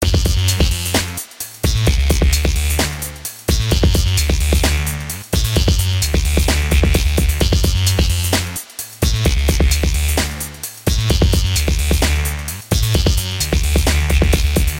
now beat synth 5
These sounds are from a new pack ive started of tracks i've worked on in 2015.
From dubstep to electro swing, full sounds or just synths and beats alone.
Have fun,
Bass, beat, Dance, Dj-Xin, Drum, Drums, EDM, Electro-funk, House, loop, Minimal, Sample, swing, Synth, Techno, Trippy, Xin